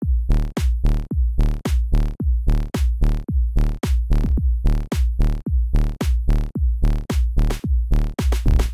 Simple Four to the Floor Loop
A simple four to the floor beat I whipped up in a tracker. Uses opm's drumatic set. I believe the bass is playing a D.
thanks for listening to this sound, number 144261
110bpm, floor, four, house, loop, simple